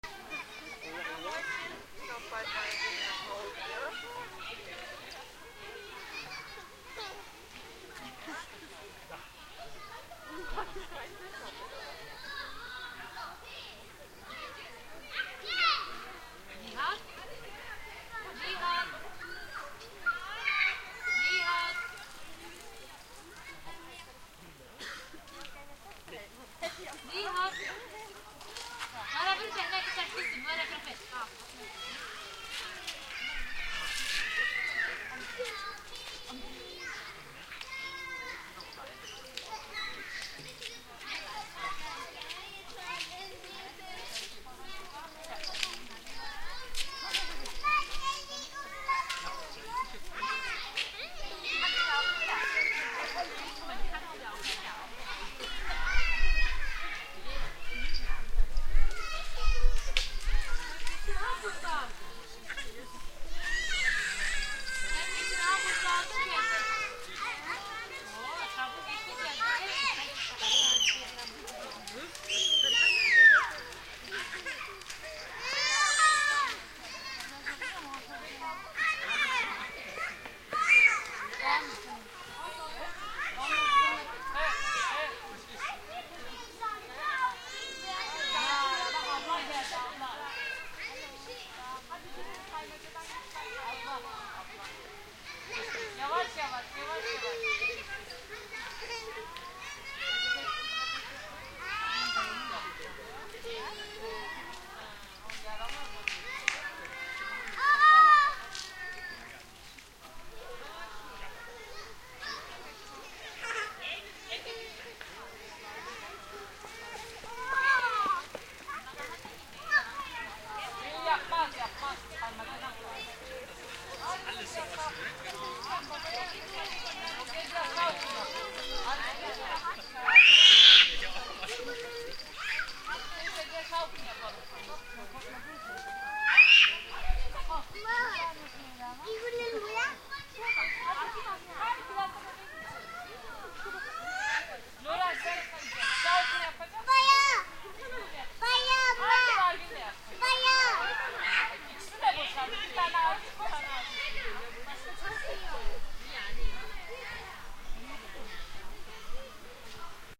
Playground children 02
Children playing on a playground in a park (german and ohter languages)
High Quality Digital Stereo Record
playschool, yard, park, children, play, Playground, german, sandbox, outdoor, playing